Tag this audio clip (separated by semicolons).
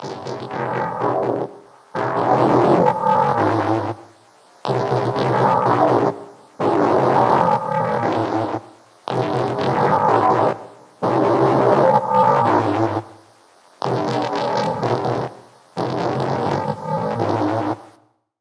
industrial; mechanical; noise; obscure; robotic; strange; weird